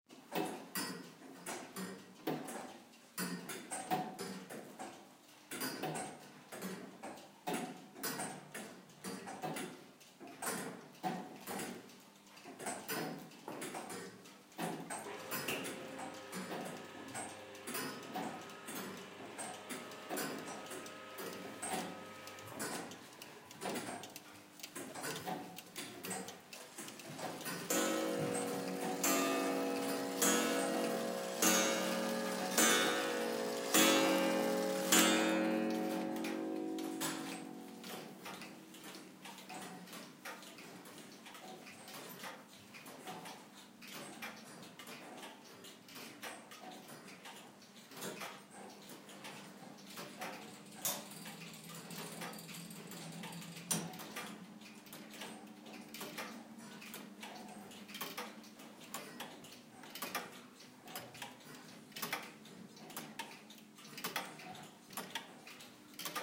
clock scape bad iburg
Recorded with the iPhone (iTalk App) in the clock museum Bad Iburg close to Osnabrück, Germany. I walked through the room while recording.
sound, ticking, clockworks, bell, clock, museum, tac, chime, tic